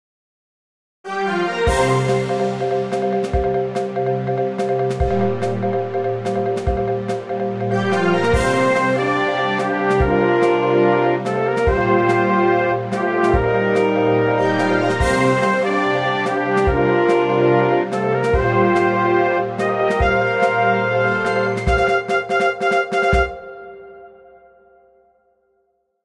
Potential News music that could introduce news segments.
report, introduction, instrumental, television, MIDI, music, jingle, news